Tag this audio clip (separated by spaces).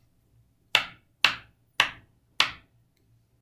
footsteps foley heel